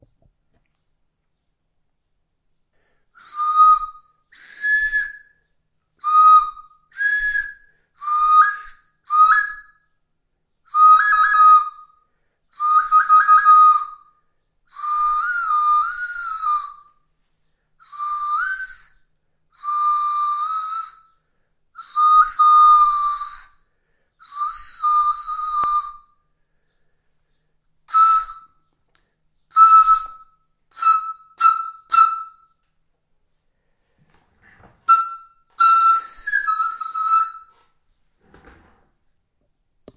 nose wisthle
nose whistle recorded on zoom h5n
whistle, nose, flute